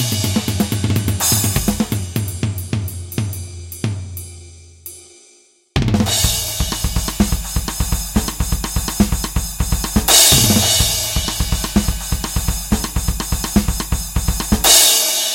TIG New Wave 125 Tijo Solo
From a song in an upcoming release for Noise Collector's net label. I put them together in FL. Hope these are helpful, especialy the drum solo and breaks!